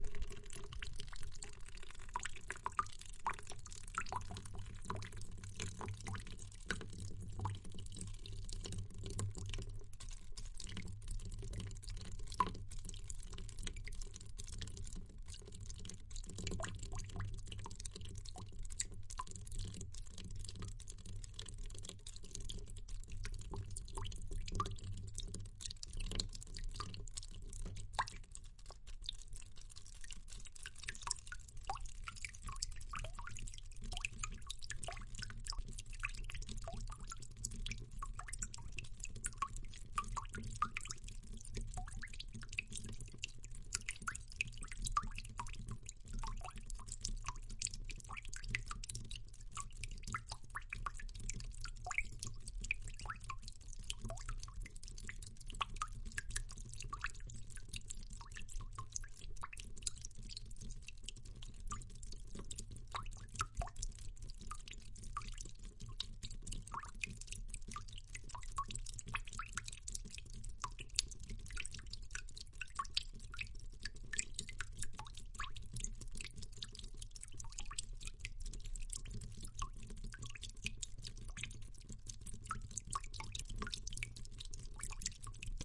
DropletsWater2 Beautiful
Trying to fix my pipe in the toilette. But I´m no plummer - so total desaster.
Anyway - the best I could do - take my Zoom and record some nice water dripping
sounds.
Hope this is more useful than my handywork :-)
drip, dripping, drop, drops, rain, raindrops, raining, shower, water, wet